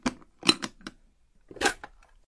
Plastic toolbox O
opening, toolbox, box, close